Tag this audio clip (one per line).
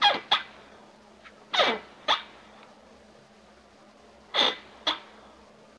chair
effect
groan
groaning
lofi
squeek
squeeky
squeel